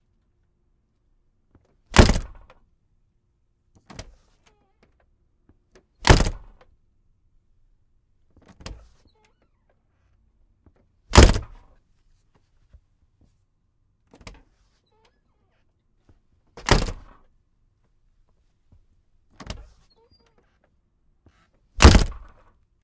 wooden Door slamming small room
The sound of closing a wooden door in a small room